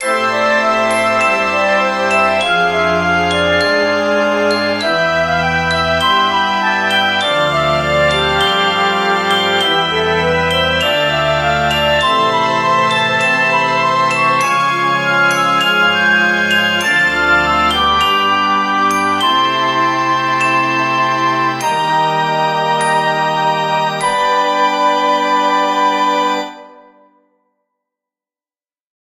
Colors of Light - Rainbow
This is a small piece of music I invented about the sun breaking through the clouds after the rain. Imagine the changing colors of light.
air, symphonic, fragment, breakthrough, ethereal, rainbow, change, color, light, sound, harmony, musical, organ, effect, sun, soundeffect